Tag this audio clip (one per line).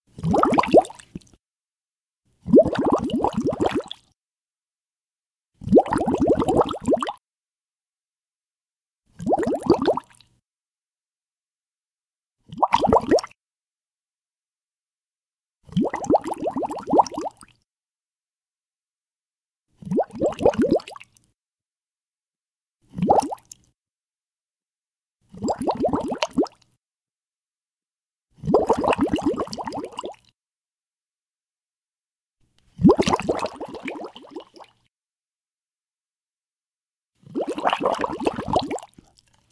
boiling
bubbling
bubbles
underwater
water
bubble